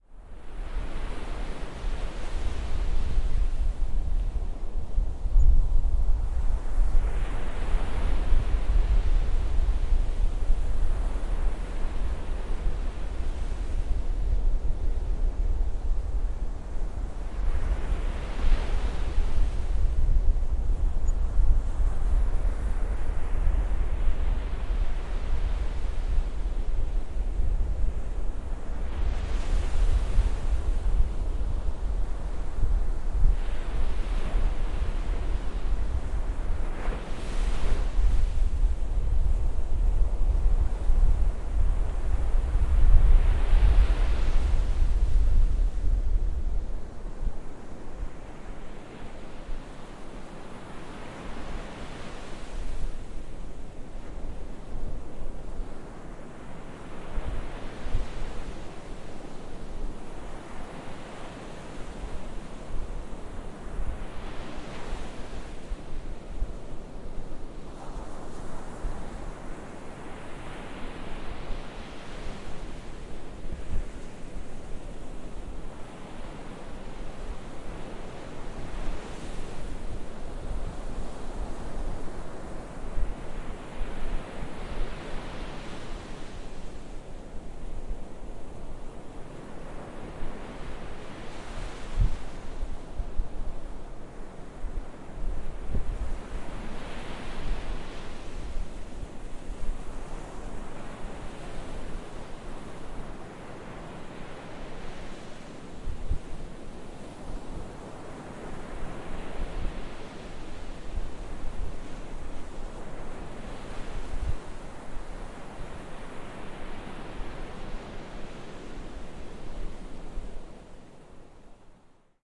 sea shore of Hvide Sande, Danmark
recorded in autumn 2014 at Bjerregaard Beach, Danmark with a zoom H4N
seaside, sea, wave, water, waves, ocean, shore, sand, beach, wind, field-recording, coast